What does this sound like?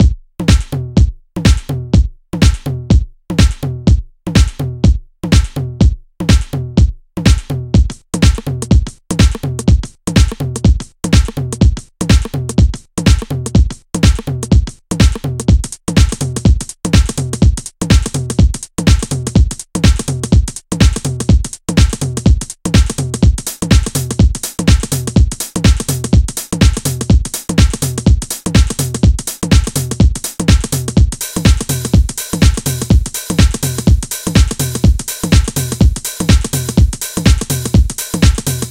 Homework Inspired House/Techno Drum Loop (124 bpm)
124-bpm, beat, drum, drum-loop, drums, hard, house, loop, percussion-loop